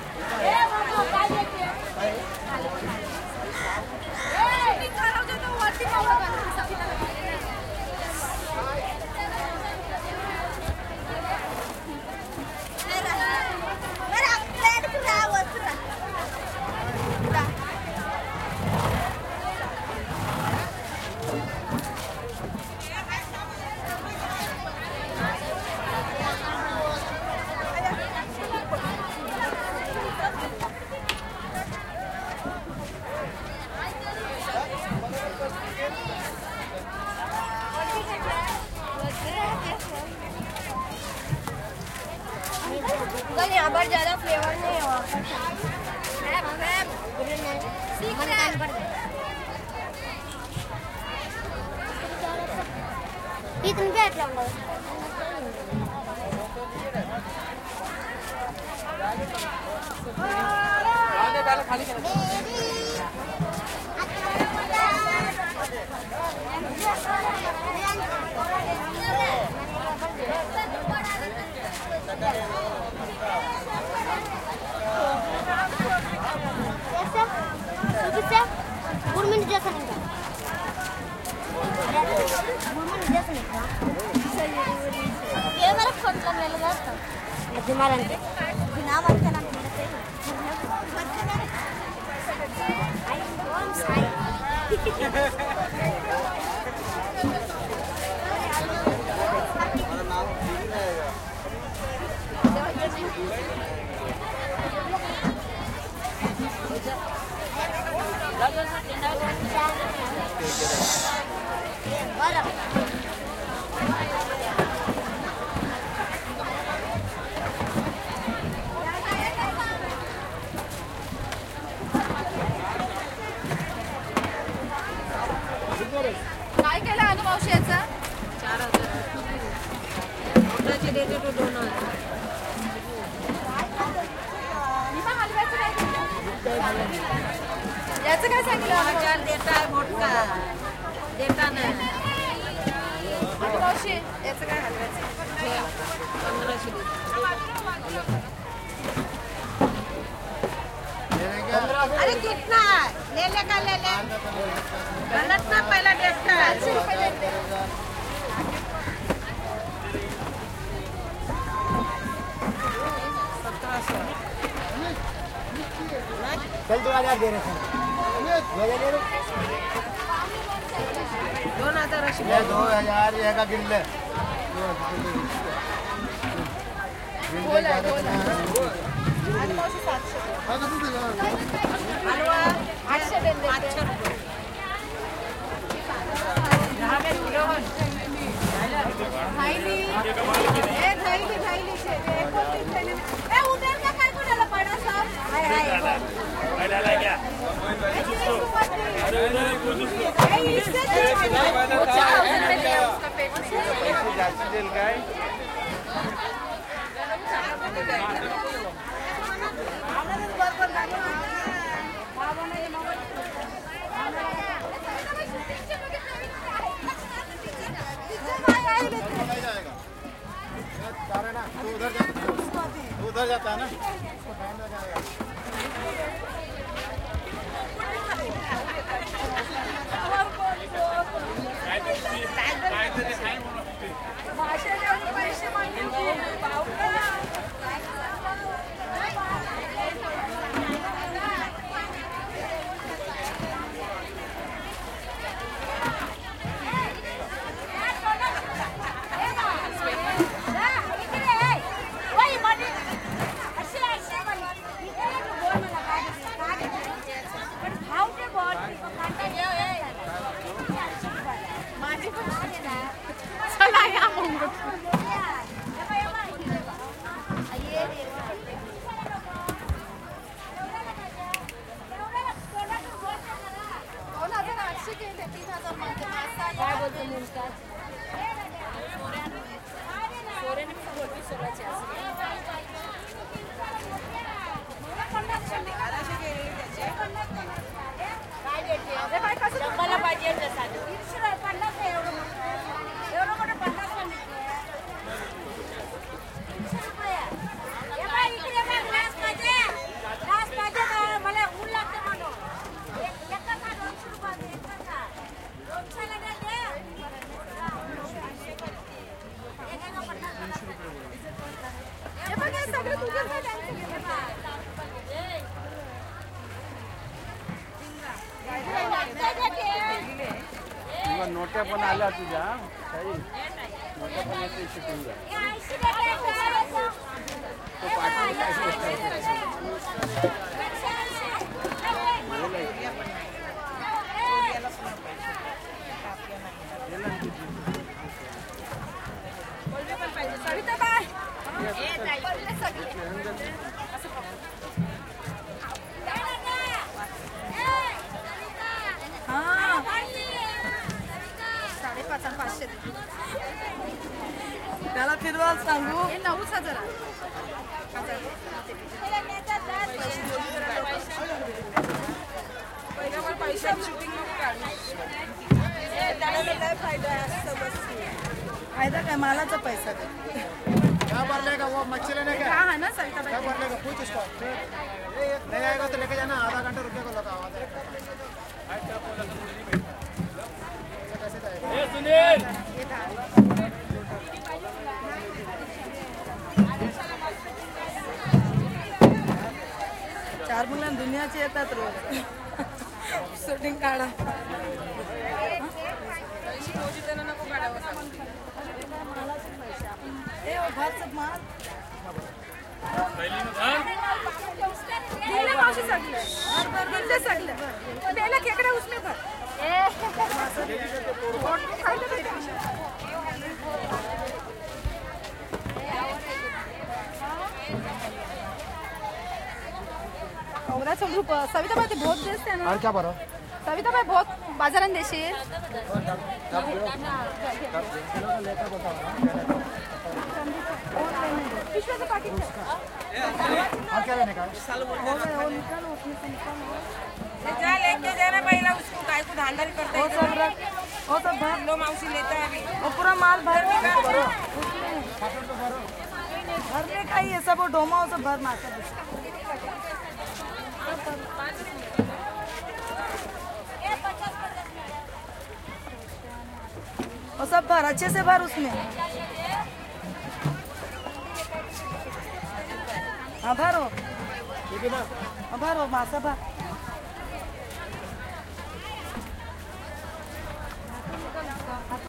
fish market ext busy active10 ice coolers voices frenzy2 India
active, busy, coolers, ext, fish, ice, India, market, voices